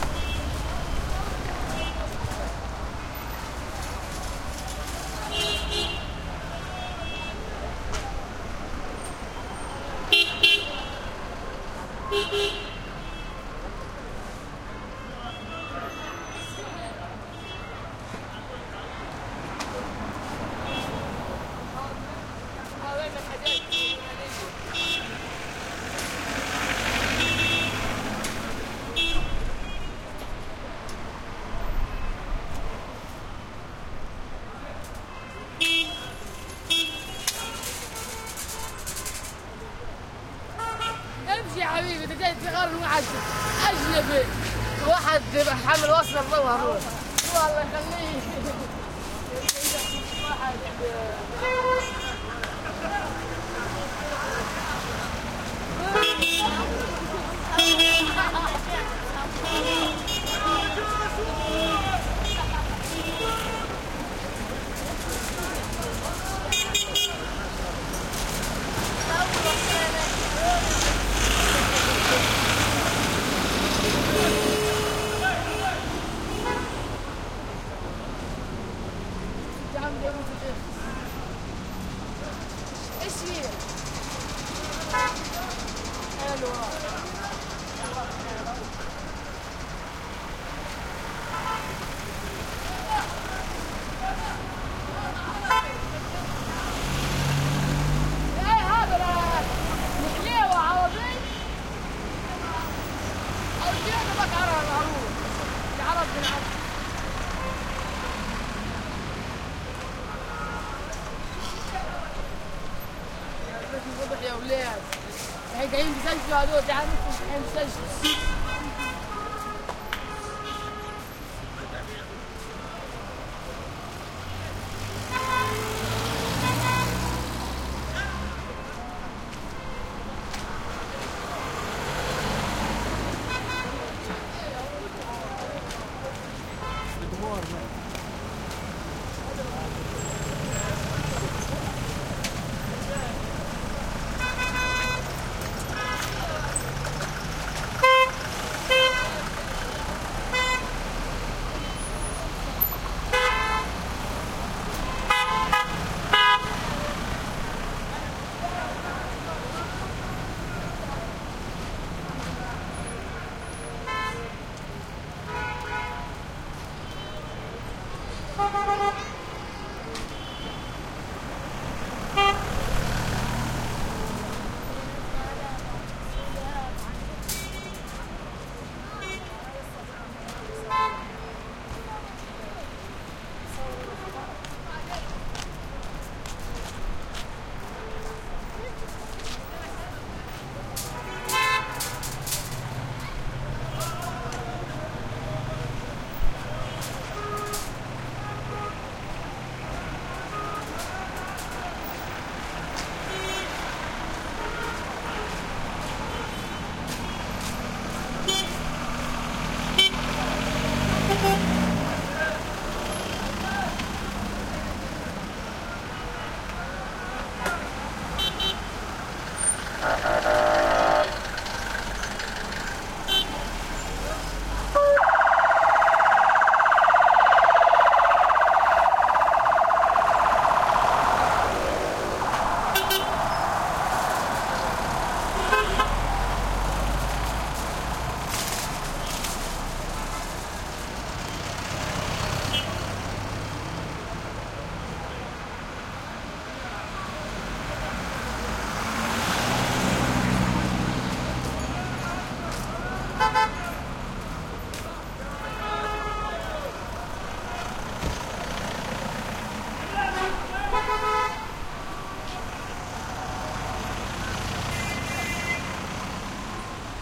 traffic medium Middle East busy street near market throaty cars horn honks arabic voices1 +close kid middle, ambulance pass siren pulse end Gaza Strip 2016
busy, cars, city, East, honks, horn, medium, Middle, people, street, traffic